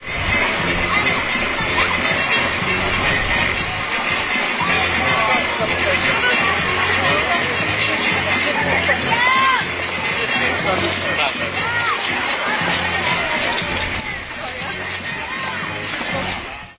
saint martin parade 111110
11.11.2010: 14.00. the annual Saint Marin parade starts. very short recording. Parade is organized every year on 11.11. this is a name day of Saitn Martin street. Poznan in Poland
crowd, poland, street, hubbub, poznan, noise